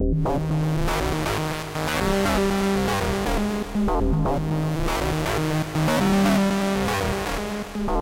a simple background melody with smurgler synth. 4/4. 120bpm. 4 bars in length.
background, rhythmn, smurgler, synth, synthesiser